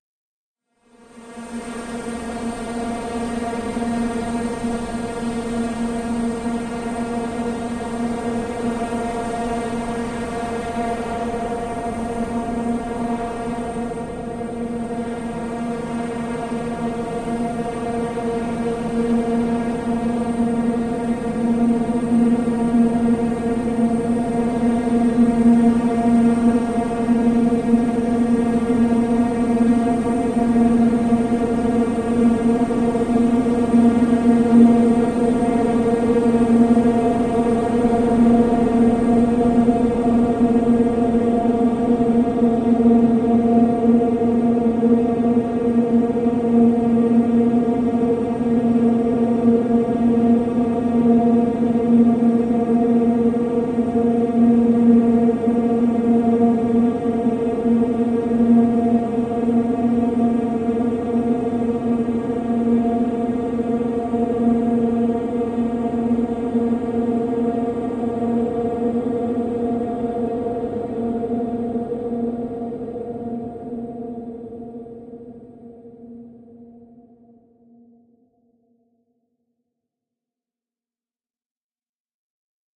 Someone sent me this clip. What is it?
LAYERS 003 - Helicopter View - A#3
LAYERS 003 - Helicopter View is an extensive multisample package containing 73 samples covering C0 till C6. The key name is included in the sample name. The sound of Helicopter View is all in the name: an alien outer space helicopter flying over soundscape spreading granular particles all over the place. It was created using Kontakt 3 within Cubase and a lot of convolution.